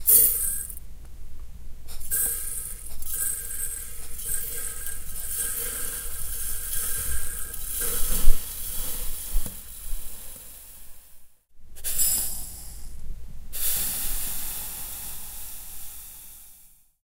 Miked at 3-4" distance.
Salt poured into metal and glass receptacles.
pour, salt, sand, shake, shaker